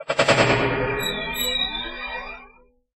Guitar stuttering and sliding